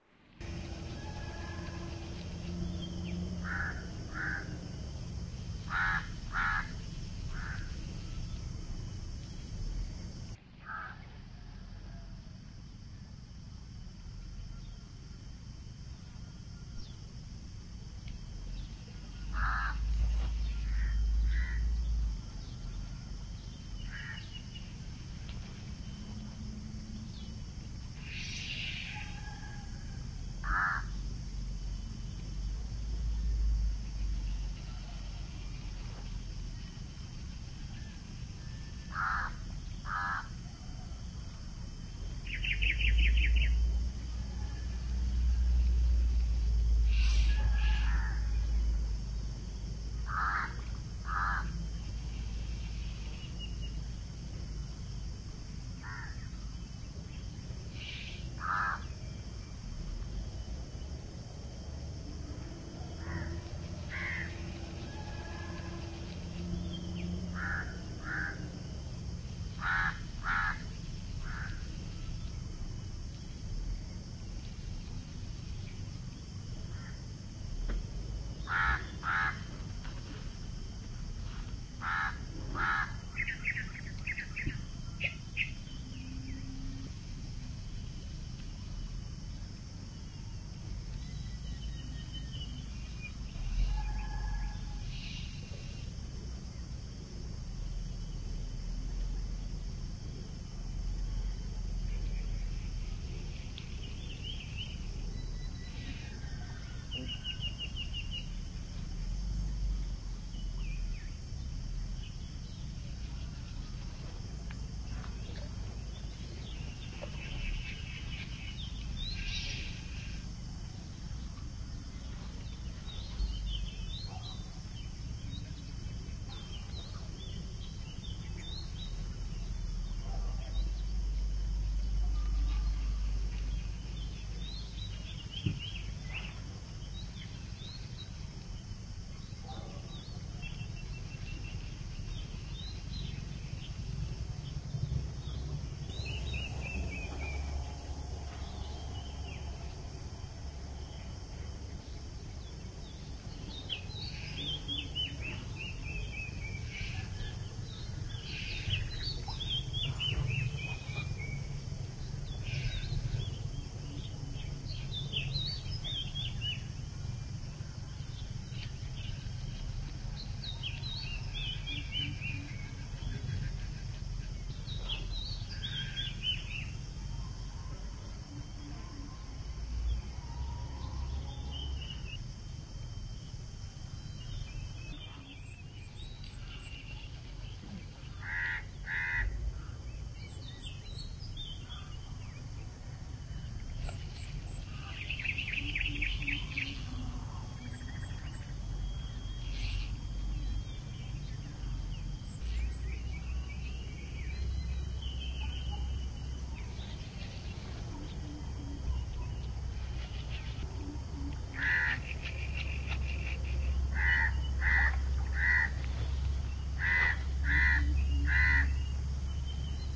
I made this field recording using GoPro Hero 7 Black in July 2020. It was recorded during the day around lunchtime in the jungles outside of Kuala Lumpur at the Selangor border